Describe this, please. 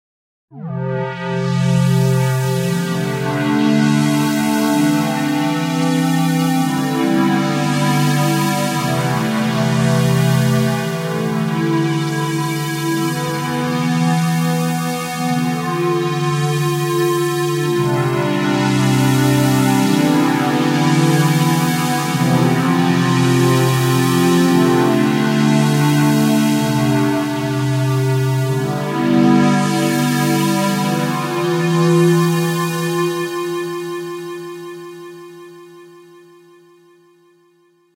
Ambient Chords 4
texture, pad, synthesizer